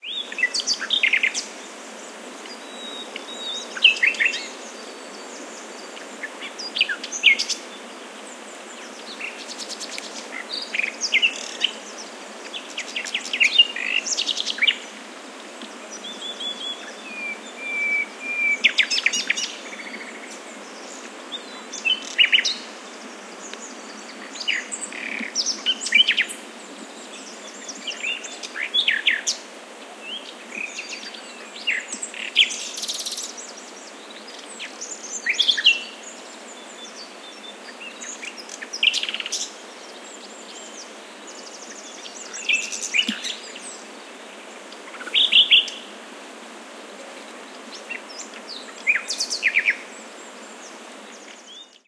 20160414 nightingale.windy.01
Nightingale singing in foreground, background with murmur of wind + stream + other birds calling. Audiotechnica BP4025 inside blimp, Shure FP24 preamp, PCM-M10 recorder. Recorded near La Macera (Valencia de Alcantara, Caceres, Spain)
field-recording, nature, birds, wind, spring, nightingale